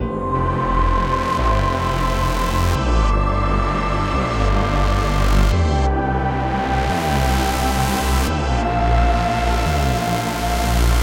A loop made with synth chords, fat bass, and voice but no beat done with fl7 @ 174bpm
choards, cut, fat-bass, flstudio, loop, synth, voice